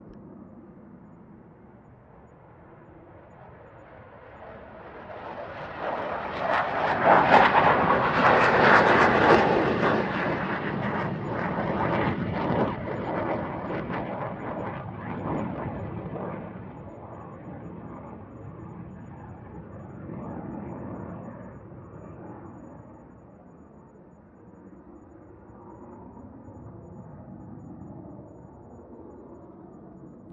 Field recording: F16 fighter jet taking off from runway at Leeuwarden airbase Netherlands.